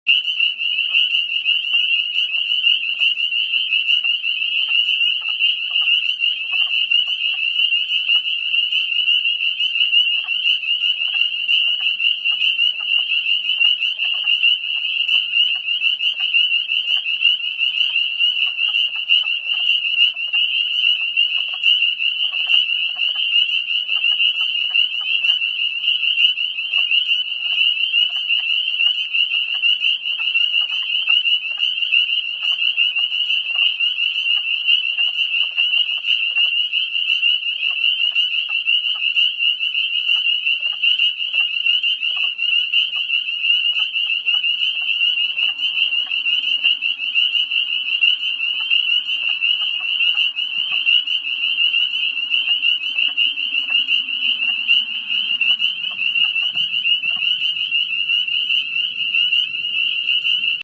Holy crap these little frogs are loud!! Recorded at a pond near our house.